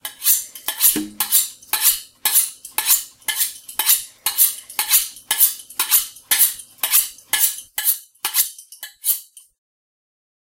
The sound of sharpening a kitchen knife.